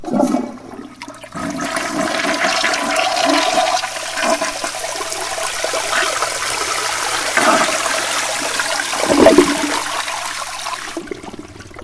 Bathroom Toilet
flush, toilet, wc